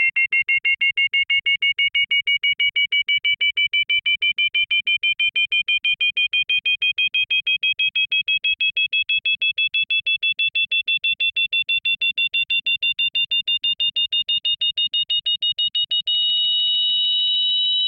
A Increasing target lock tone I generated for a personal project. It is Rough and meant to be tweaked for your needs. Sound is supposed to emulate an electronic weapon lock sound.